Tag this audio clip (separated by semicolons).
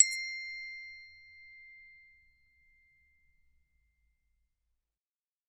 percussion; bell; Christmas